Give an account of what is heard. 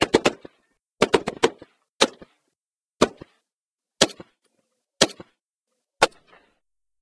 Rifle Bullet Shots in Afghanistan [RHdOtB0cTus]
Gun shots by modern armed soldiers.
Note that while the video uploader may not be a soldier, the video material was made by an US Army soldier during duty.
burst, military, target, arms, m16, bursting, firefight, bullet, projectile, pistol, fire, gun, shooting, rifle, bullets, armed, targeting